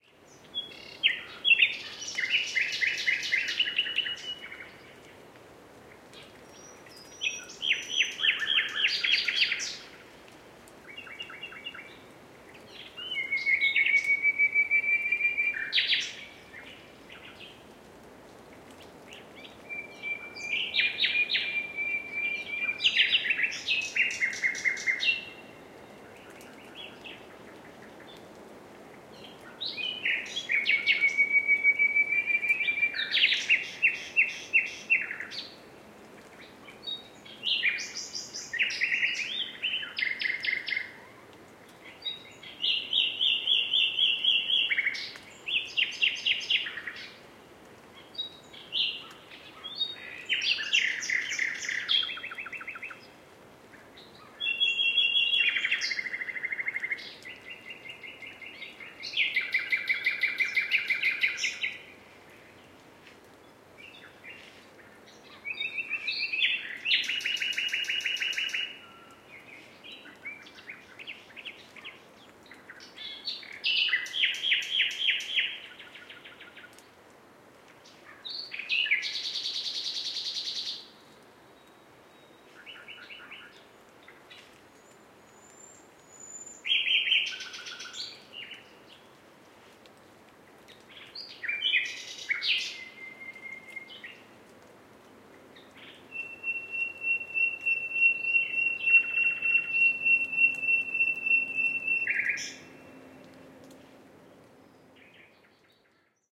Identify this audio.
Nightingales-concert of several Nightingales in QUADRO-short version
This is an extract of the 30 minutes version of:
(This extract starts at 5:21 of the long version)
For further description of the longer version read here:
Used recording gear:
ZOOM F8
4 x Rode NT1 (without "A" = the black ones)
CH1 = FL
CH2 = FR
CH3 = RL
CH4 = RR
The Download-file is a PolyWAV.
If you need to split the file (e.g. to make a stereo file), you can use the easy to use
from Sound Devices for example.
Comments about this atmo-record are welcome!
Zoom-F8, Surround, birdsong, 4-ch, 4-channel-record, nature, 4-channel-audio, Outdoor, Rode, Nightingales